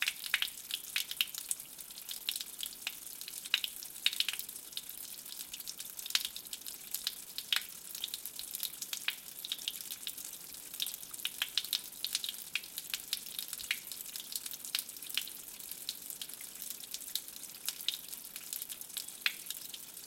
Water Fountain 03
stream, fountain, ambiance, ambience, water, field-recording, ambient, atmosphere, nature